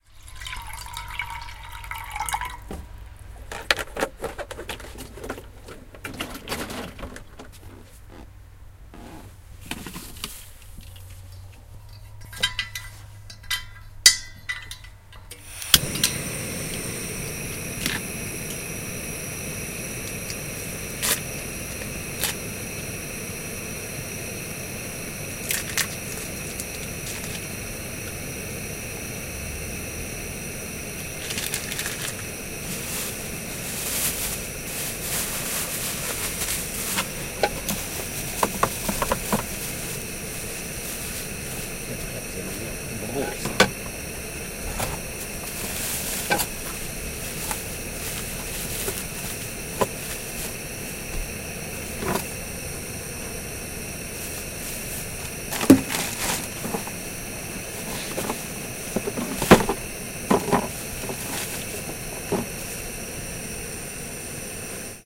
110816-cooking sausage in langenfeld
16.08.2011: seventeenth day of ethnographic research about truck drivers culture.Langenfeld in Germany. Pause. Cooking sausage inside the truck cab. Sound of pouring water and camping stove.
cooking, rattle